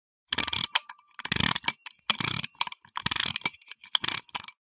Winding up a 1930s era Baby Ben alarm clock.
clockwork, antique, loopable, wind-up, clock, mechanical, old, mechanism, winding, music-box